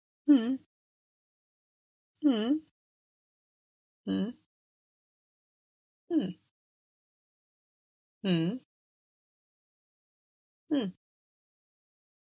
Woman saying hmm a few times as if she is being convinced
think, decide, girl, voice, deciding, hmm, convince, thinking, vocal, woman, female, agree